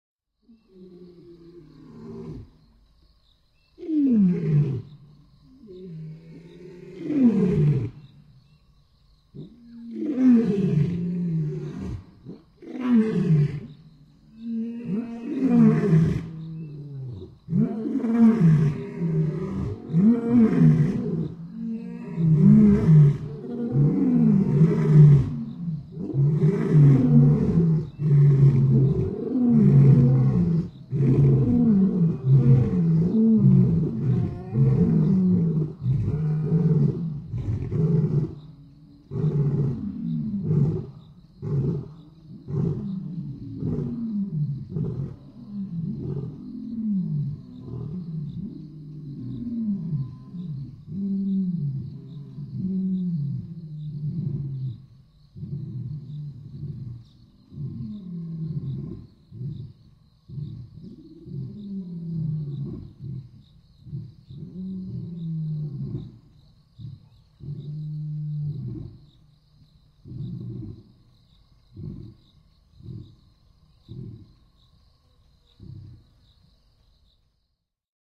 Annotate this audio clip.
Lion roars 2
Male lions roaring. Recorded at Ukutula Game Reserve, South Africa.
Mics used: Rode NT1A stereo pair in ORTF position
Recorder: Zoom H4Npro
ambience, field-recording, nature, ukutula, africa, south-africa, lion, lions, roar